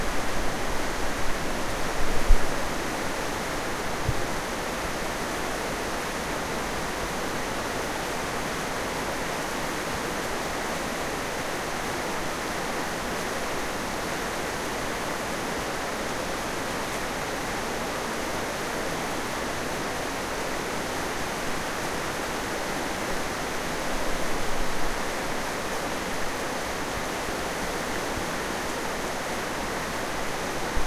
Kelvin Bridge Underpass2
Recording of the River Kelvin in Glasgow. Microphone was positioned on top of a fence from the path that runs alongside if at Kelvin Bridge
Recorded on an iPhone 4S with a Tascam iM2 Mic using Audioshare App.
Tascam-iM2, Bridge, River-Kelvin, iPhone-4s, Kelvin, Glasgow, AudioShare